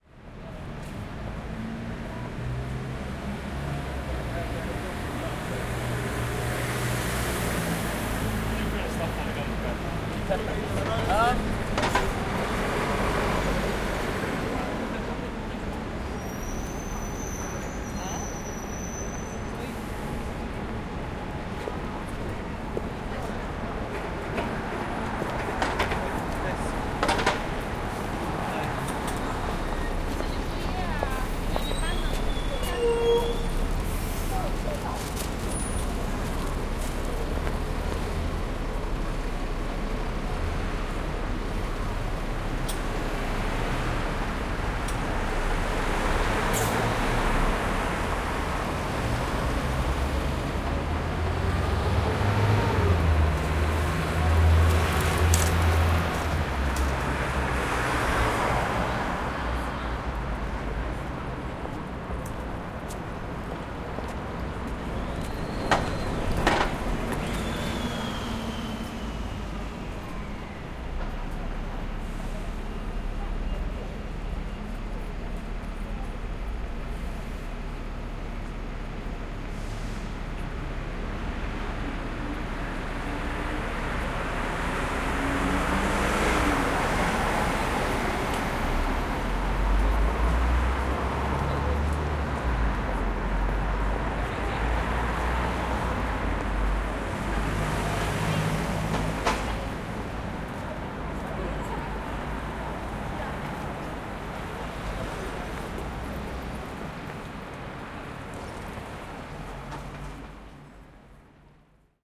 Gower Street entrance - UCL (University College London)
Field recording at UCL's main entrance on Gower Street. Recorded 4 December, 2012 in stereo on Zoom H4N with windscreen.
academic, bustle, feet, noise, Gower-Street, London, University-College-London, academia, street, bus, traffic, field-recording, UCL